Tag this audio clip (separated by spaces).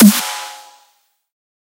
snare dubstep